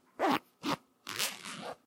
pulling jacket zipper